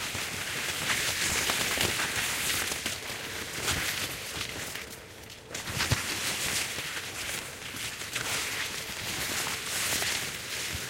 Hiking through corn 2
Better quality than the first upload. You can hear a train whistle in the distance.
field-recording, nature, outdoors, random, stochastic